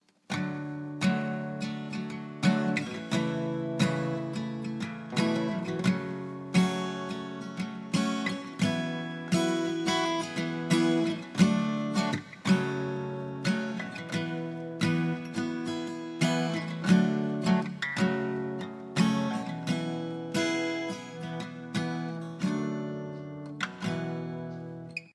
Acoustic Guitar Chords 87 bpm
87bpm, guitar, progression, acoustic, chords